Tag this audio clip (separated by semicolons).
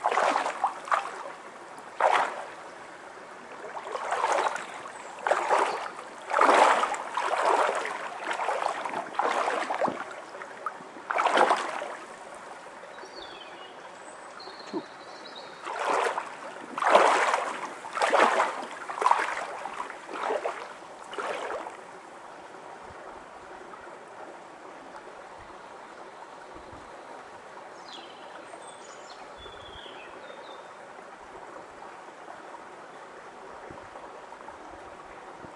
field; walking; bird; water; rjecina; canyon